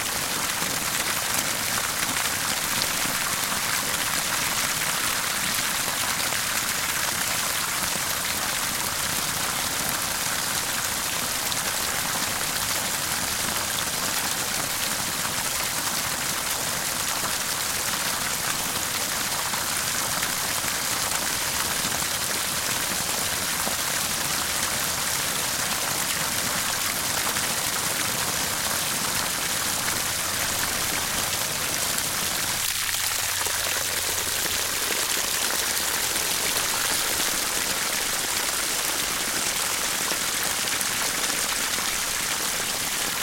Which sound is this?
Water Spigget onto Mud 2
drain, ground, leak, mud, outdoor, pipe, spigot, tap, valve, water, water-flow